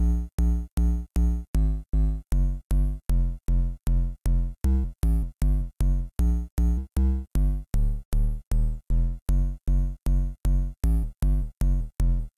old school type bouncing bassline that could use an mc, sounds like it could get everyone up and moving! BOOM!SELECTAH!